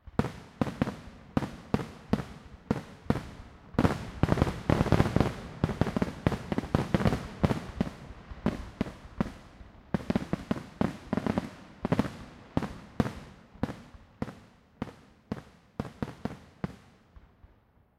Fireworks in a small valley, over a lake. Many burst of fireworks, and their decay.